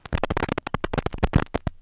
Various clicks and pops recorded from a single LP record. I distressed the surface by carving into it with my keys and scraping it against the floor, and then recorded the sound of the needle hitting the scratches. Some of the results make nice loops.
analog, loop, record, noise, scratch, glitch